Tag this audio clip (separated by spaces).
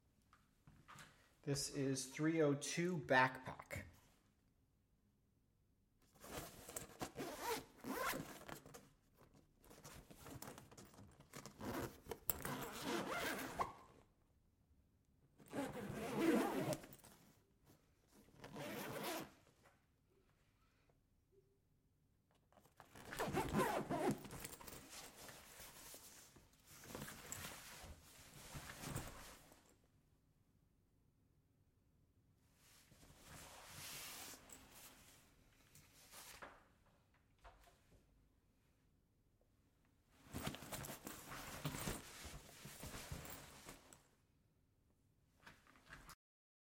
suitcase zip backpack bag zipper zipping unzipping unzip